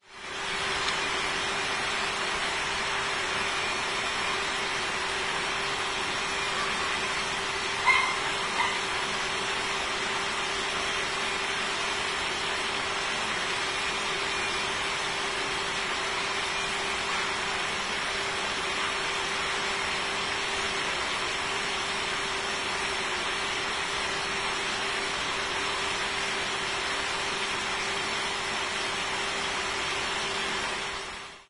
08.09.09: about 20.00; Tuesday in Sobieszów (one of the Jelenia Góra district, Lower Silesia/Poland); Ignacego Domeyki street; recording of the delicate noise from the paint's factory plus the swoosh of the Wrzosówka river
ambience, factory, g, industrial, jelenia, poland, river, sobiesz, swoosh, w